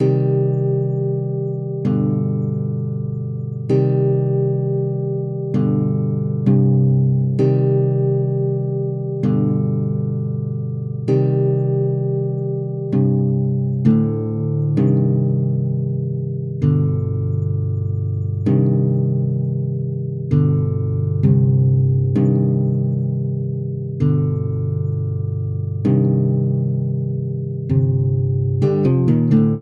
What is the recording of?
New sad guitar melody
Made with FL Studio.
Using C minor chords, I made this sad melody.
130 BPM.
But with Reverb.
Injoy.
acoustic, guitar, sad-melody